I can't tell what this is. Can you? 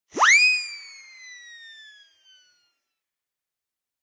This siren whistle sound is often used as a comical cartoon sound effect where something is sent flying through the air. The acme siren is a chrome plated whistle that is often found in the percussion section of orchestras.
Recorded in my home studio using a Rode NT1A through a FocusRite Liquid Saffire preamp and ProTools 12.